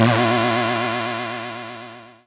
Cartoon, Stunned 01
rpg, hit, nostalgic, character, nostalgia, colossus, stun, cartoon, stunned